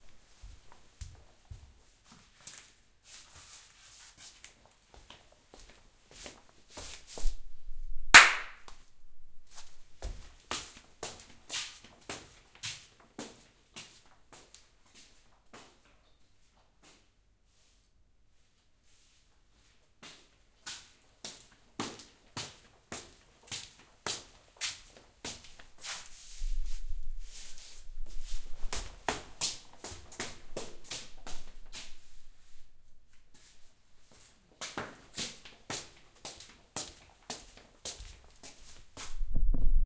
Walking in slippers on floor